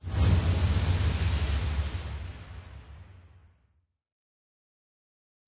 By request. A whoosh. 1 in a series of 7 - a large punch and a bit of reverbI took a steady filtered noise waveform (about 15 seconds long), then added a chorus effect (Chorus size 2, Dry and Chorus output - max. Feedback 0%, Delay .1 ms, .1Hz modulation rate, 100% modulation depth).That created a sound, not unlike waves hitting the seashore.I selected a few parts of it and added some various percussive envelopes... punched up the bass and did some other minor tweaks on each.Soundforge 8.